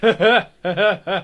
Small outburst of laughter.